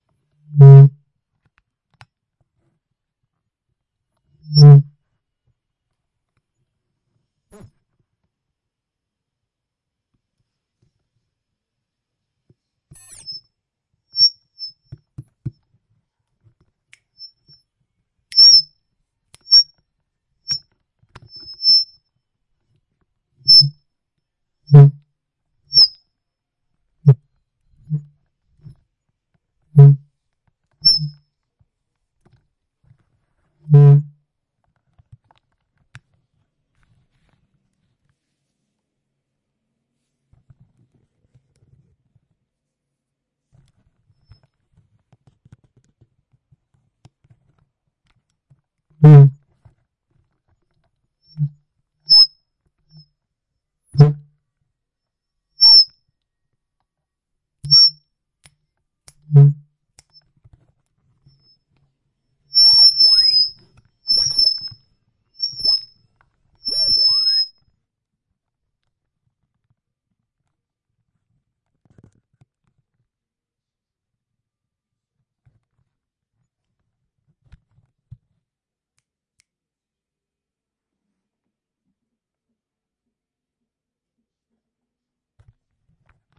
Howling speaker
A little game with a microphone and a speaker.